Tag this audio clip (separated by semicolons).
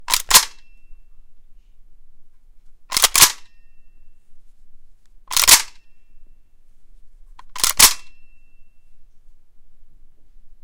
reload; shot; gun